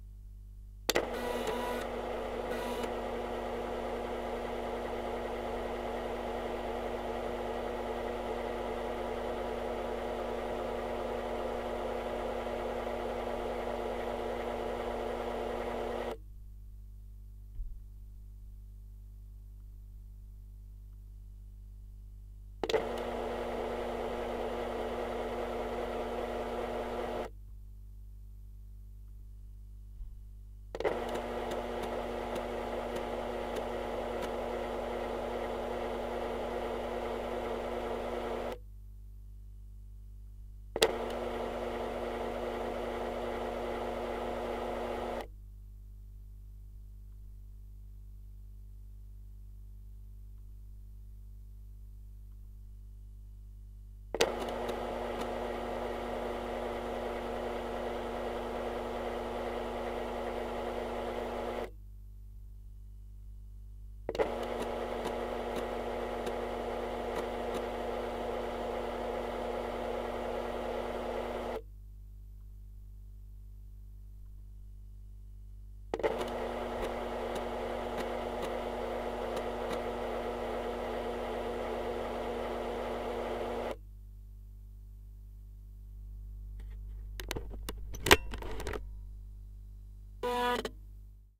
Using a piezo pickup for classical guitar to capture sound produced from using 3 1/2 USB floppy disk drive to read, write, delete, and eject
disk, diskette, floppy, drive